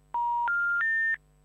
The three tone sound on the line when a phone number is misdialled